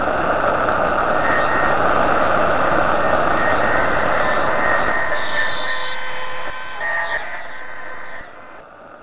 long dark thick drone with a light resolution extro; done in Native Instruments Reaktor and Adobe Audition